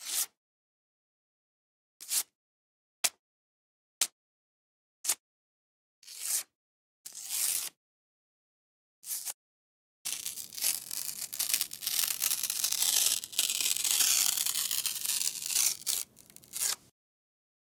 Tearing Paper Index Cards By Hand
Sound effect of paper index cards being torn, by hand, with multiple performances at different speeds and intensities. Recorded on a Neumann KMR 81i into high-end studio preamps.
Trimmed in Pro Tools with a gentle High Pass filter, no other effects added.
tearing, tearing-paper, ripping, sound-effect, paper, tear